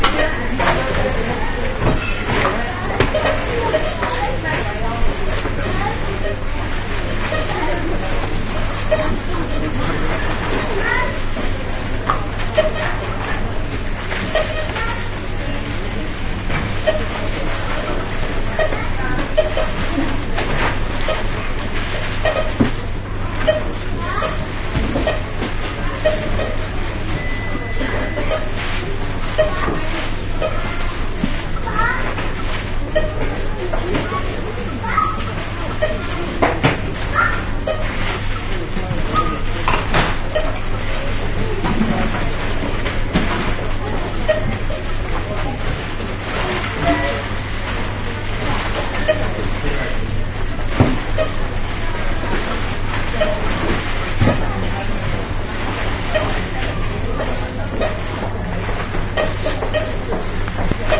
Shopping -- At The Check out --
Shopping in London at the Check Out people buying food bleeping talking
bleeping, chanting, chatting, crowd, crowds, england, intense, london, people, shopping, shops, stressing, talking, vocies, voice